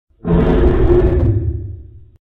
Another incredible dinosaur roar I formed from a ...... sneeze! The 101 Sound Effects Collection.